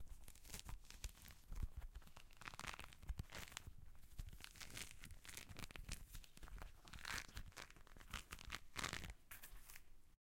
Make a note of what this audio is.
Peeling a naartjie.
OWI, Citrus, Peel, Peel-naartjie, Naartjie